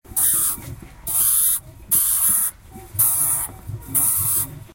Parrot screaming
parrot has issues - screams at me
pet, pets, parrot, screams, panska